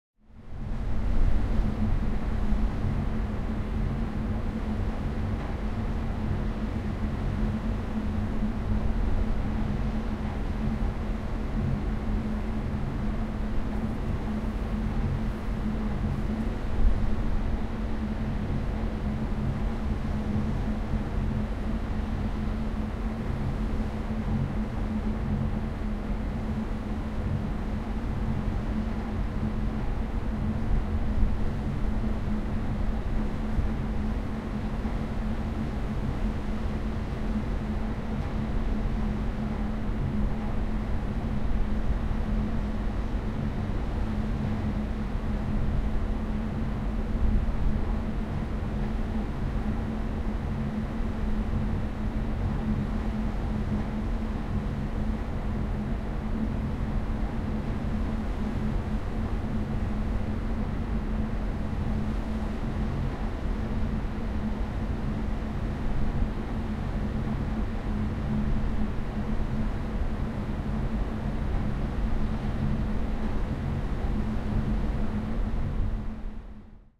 Boat engine with wind and the sea. Recorded with a Zoom H1.